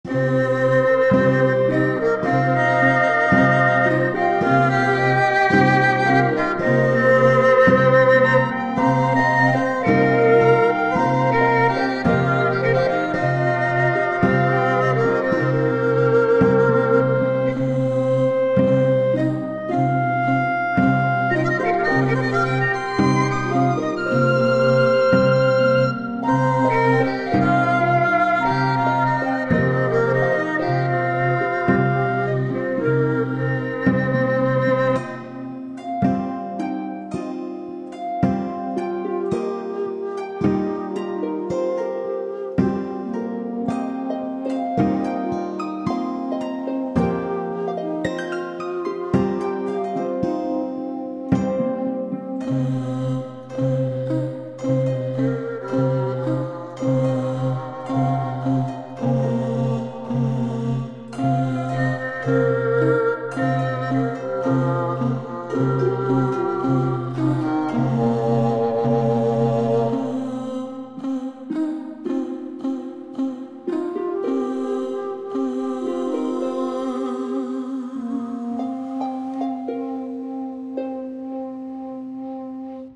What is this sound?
‘Dry Grassland’ - music loop
I created this short mp4 loop on GarageBand. It has a slow plodding rhythm and an ethnic vibe. Although it has a start and finish it plays well as a loop.